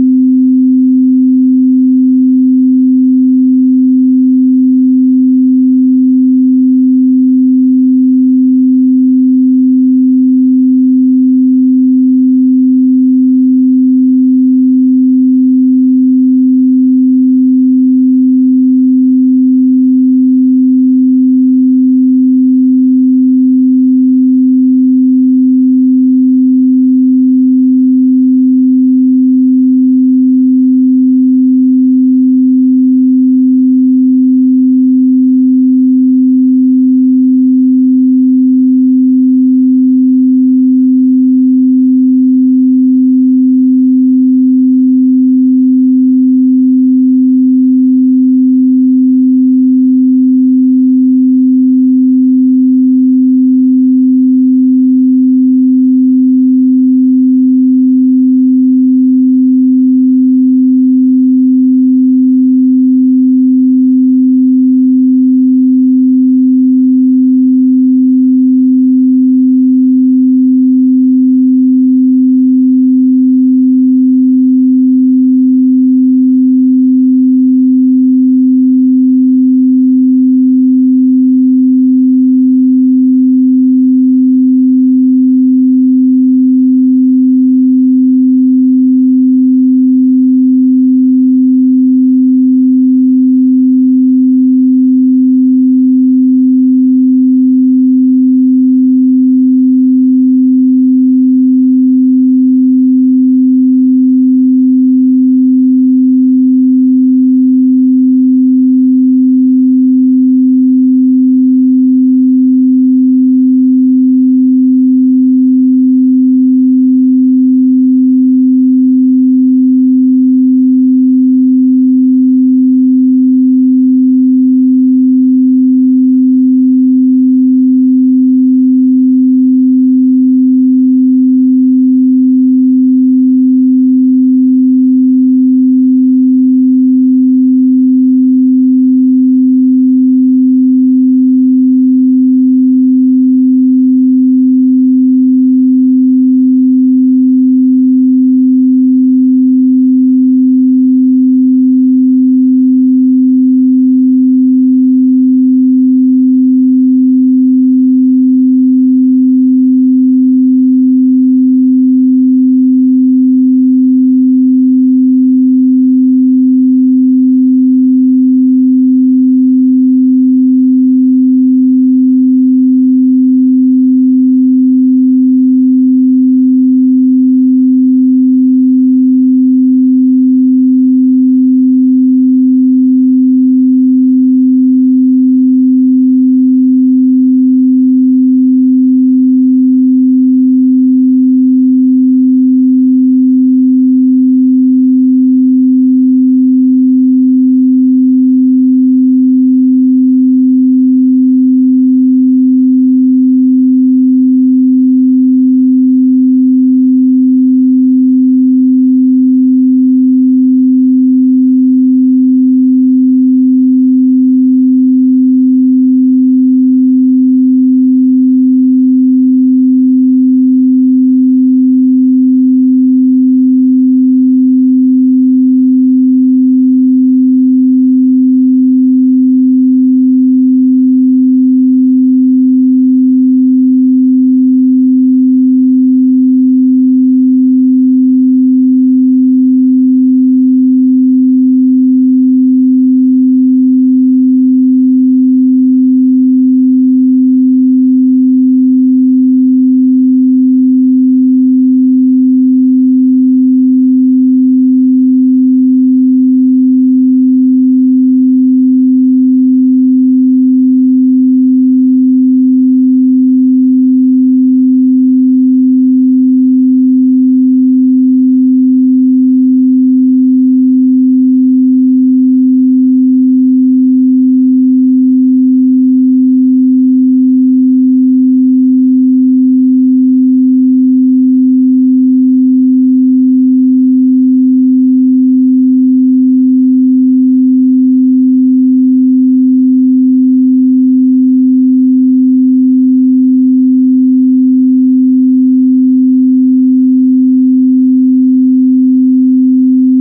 258Hz Solfeggio Frequency - Pure Sine Wave
May be someone will find it useful as part of their creative work :)
solfeggio, gregorian, tibet, yogic, ring-tone, tibetan, aum, tone, Pythagoras, hz, spin, chant, frequency, 3d, om, buddhist